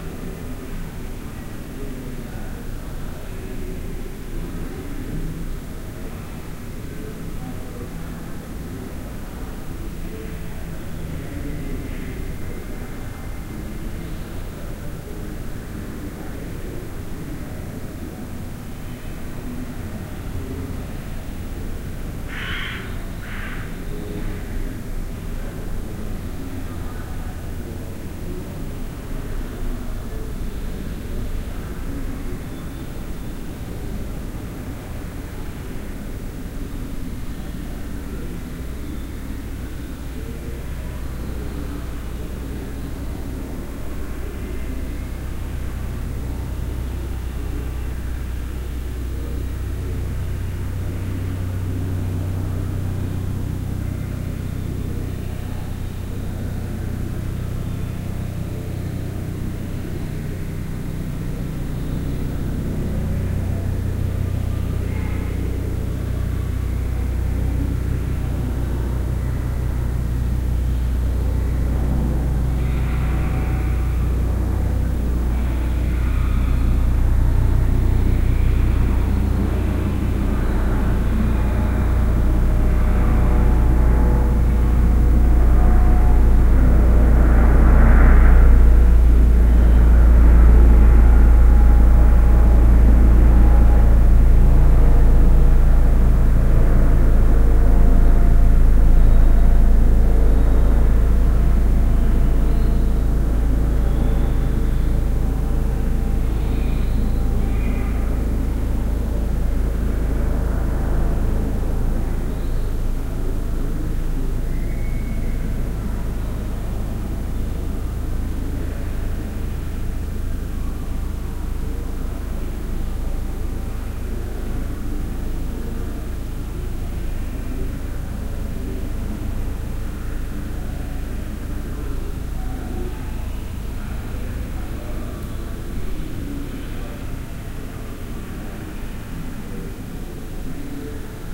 helicopter over courtyard Dresden Kunsthaus
dresden, kunsthaus